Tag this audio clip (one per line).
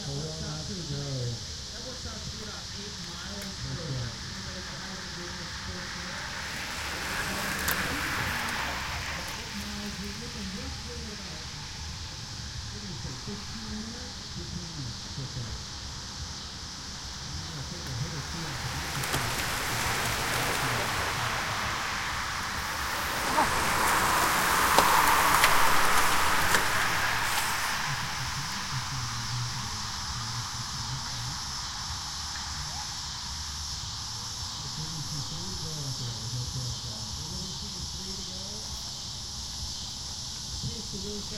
bicycle
nature
human
field-recording
announcer
cicadas